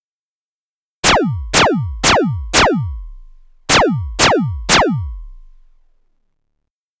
Space Gun Shoot
Combination of foley and synth sounds.
gun, laser, shoots, space